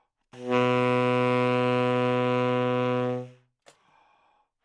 Sax Tenor - B2 - bad-attack bad-timbre bad-richness
Part of the Good-sounds dataset of monophonic instrumental sounds.
instrument::sax_tenor
note::B
octave::2
midi note::35
good-sounds-id::5239
Intentionally played as an example of bad-attack bad-timbre bad-richness